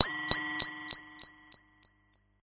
Q harsh bleep plus click delay at 100 bpm variation 1 - C6
This is a harsh bleep/synth sound with an added click with a delay on it at 100 bpm. The sound is on the key in the name of the file. It is part of the "Q multi 001: harsh bleep plus click delay at 100 bpm" sample pack which contains in total four variations with each 16 keys sampled of this sound. The variations were created using various filter en envelope settings on my Waldorf Q Rack. If you can crossfade samples in you favourite sampler, then these variations can be used for several velocity layers. Only normalization was applied after recording.